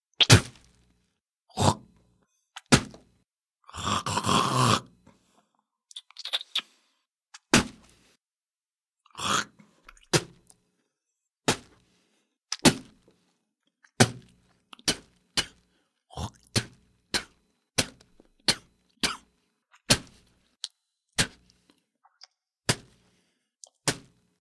Several spitting noises recorded with the aim of getting a realistic sound and nothing besides spitting. I actually spit (not dry spitting) into a plastic bag. The impact on the plastic bag can be heard, but it isn't too bad. The first half is P-spitting and the second half is T-spitting (shape mouth is held in). A little bit of horking and squishing as well. Recorded with a Shure SM58 in a treated room.
gross, hork, saliva, spit, spitting